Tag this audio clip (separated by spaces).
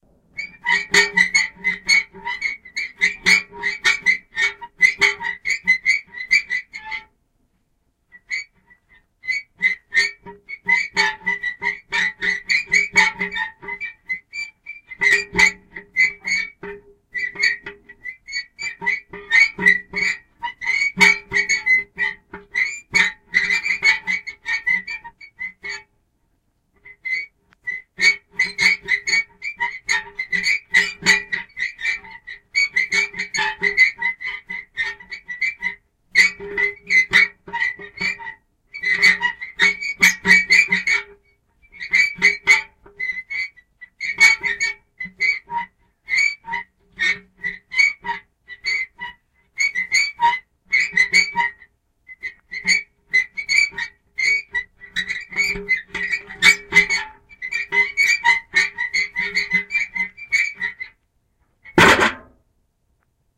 bucket creak cringe metal sqeak